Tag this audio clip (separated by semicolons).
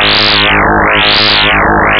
two-second; loop; electronic; mono; hifi; fm; 16-bit; synth; sample